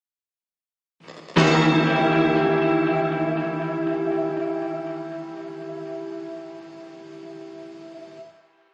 jump scare2
This jump scare sound was created by playing 2 dissonant chords on a single coil telecaster mic'd up w/ a VOX AC 10 amp.
The two guitar tracks are panned left and right- reverb and delay are added.
The delay tail you can hear oscillates between Left and Right speaker as it fades.
Additionally, frequency enhancers were added between 4kHz - 6kHz which adds a higher tonal energy in the upper range of the guitar. Gain is added which can be heard as signal noise mostly at the end of the clip.
Enjoy.
annoying, anxious, background-sound, bogey, dramatic, nightmare, scary, sinister, spooky, terrifying, thrill